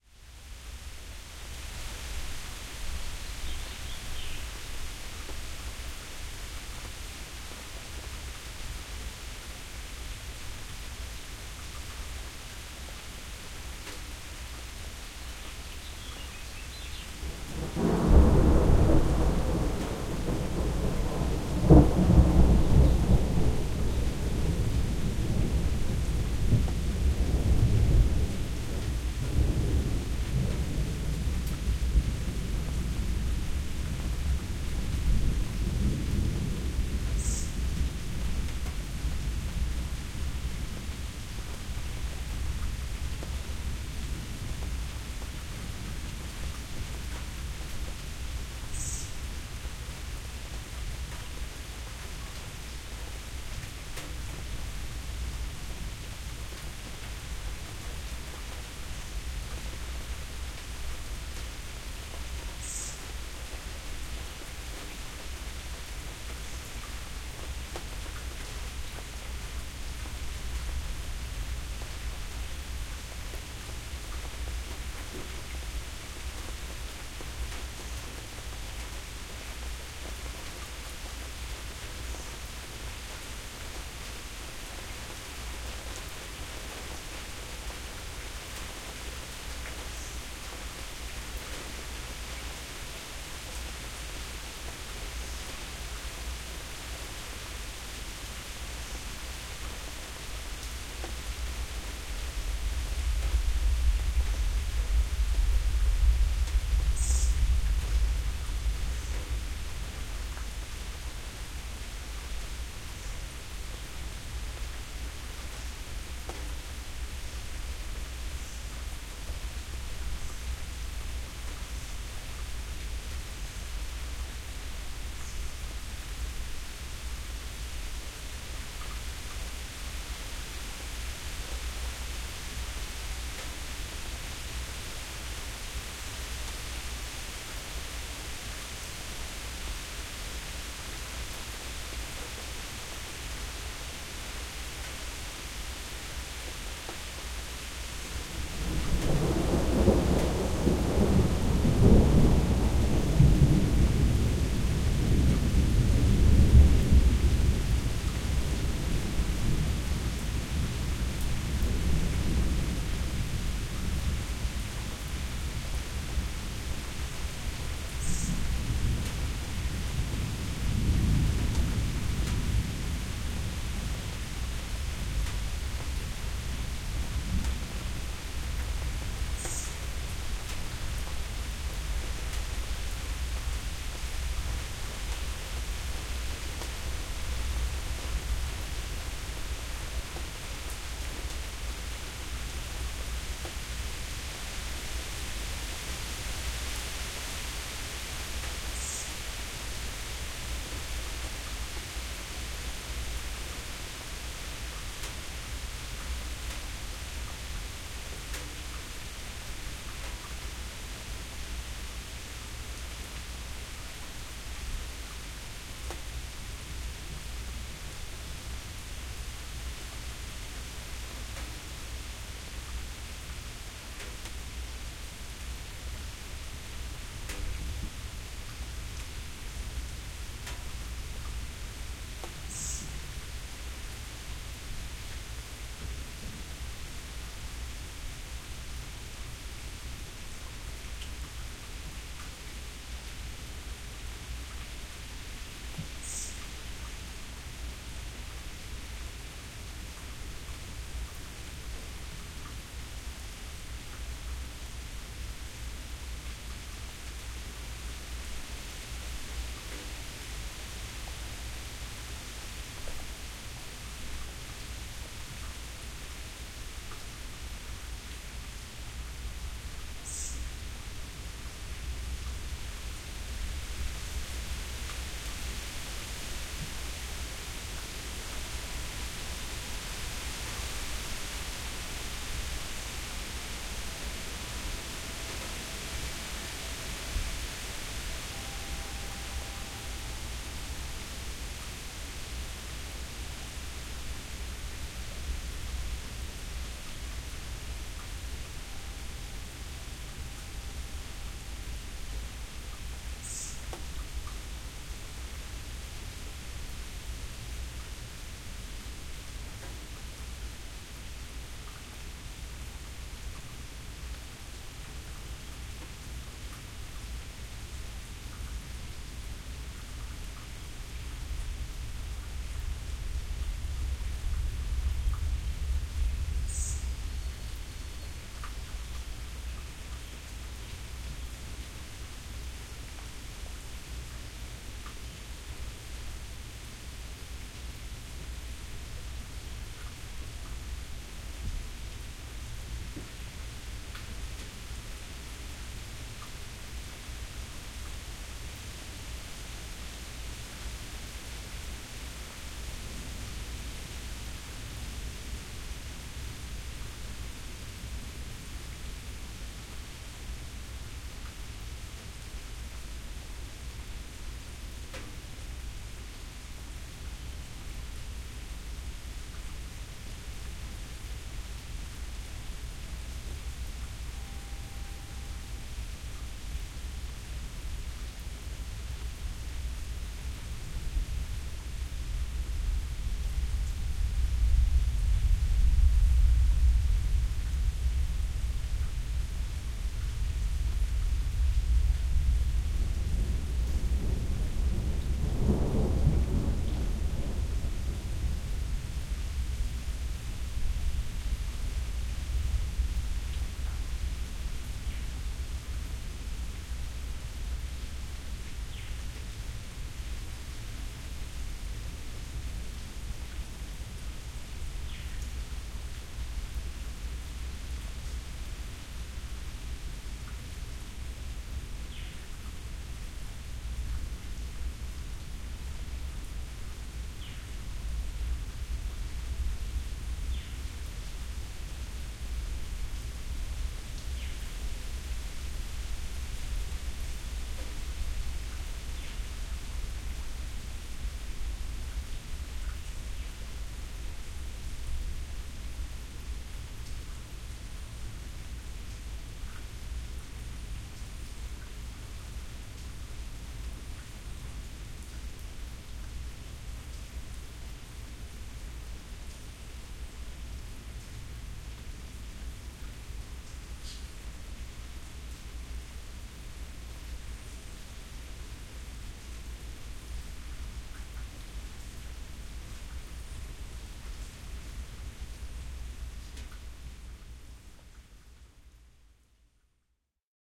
Thunder and Rain 1
Rain, Storm, Thunder